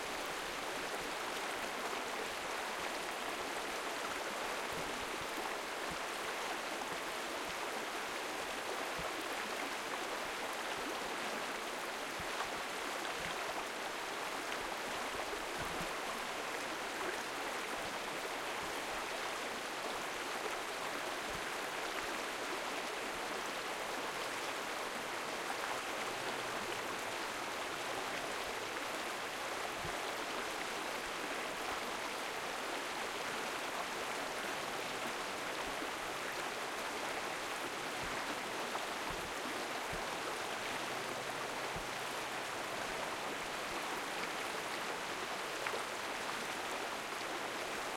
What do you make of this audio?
MISCjdr Stream River Running Water MS Recording
Close up recording of a small stream along a nature hike.
stream, water, nature, field-recording